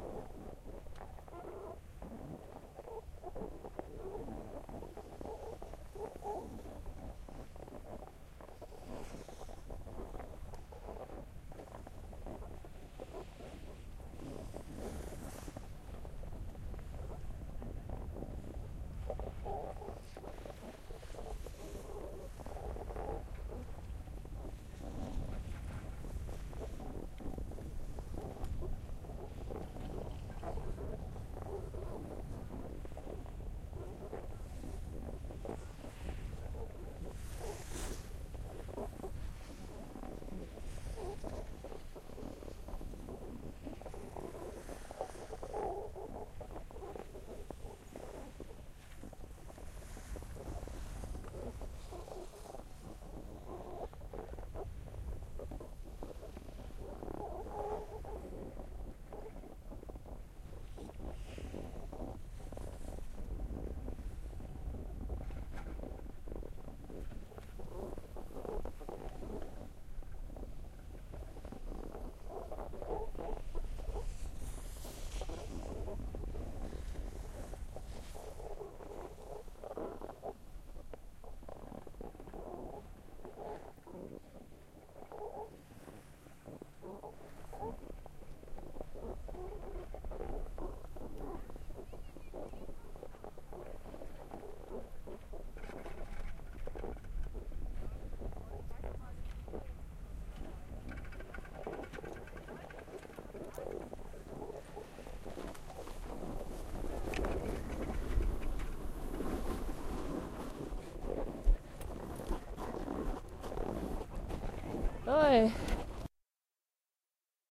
The snow squeaks under my snowboard. You can hear the skiers coming down, and the liftmasts.